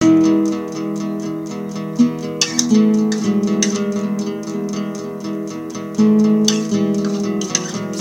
FDG Guitar
A collection of samples/loops intended for personal and commercial music production. All compositions where written and performed by Chris S. Bacon on Home Sick Recordings. Take things, shake things, make things.
looping, loops, drums, whistle, indie, percussion, sounds, Folk, samples, loop, bass, voice, synth, Indie-folk, piano, original-music, beat, free, guitar, melody, acoustic-guitar, harmony, drum-beat, rock, vocal-loops, acapella